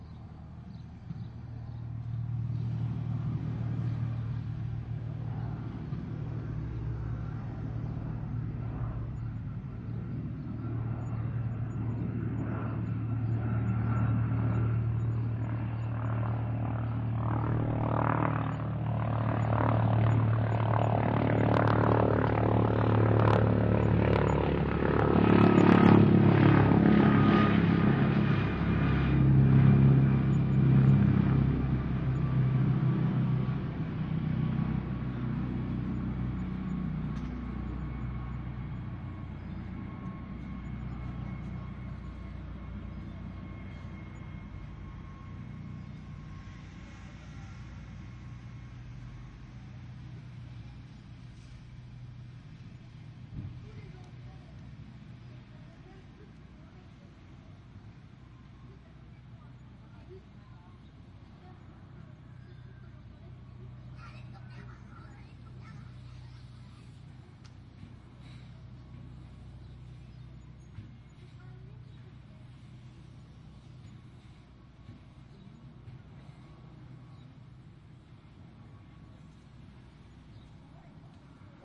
Helicopter flying Mc Donnell Douglas 520N flying
transportation, flying, flight, Helicopter, aviation